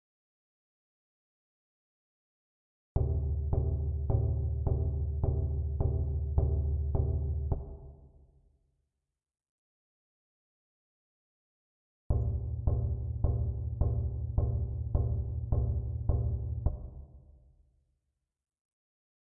IncrediblyLowPitchXylophone LMMS
A very low-pitch Xylophone from the LMMS DAW, with reverb, initially I was attempting to emulate footsteps, but it turned out to be somewhat sinister and eerie instead.